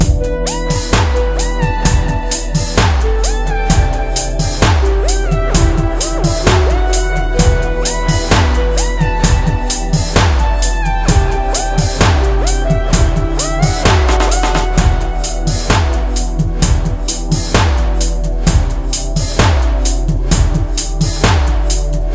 Loop NothingToFear 01
A music loop to be used in storydriven and reflective games with puzzle and philosophical elements.